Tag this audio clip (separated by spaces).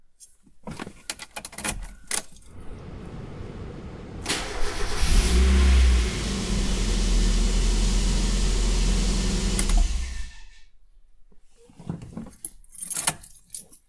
Off On Start